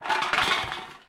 A number of small, tin drink cans (think Red Bull) being knocked over.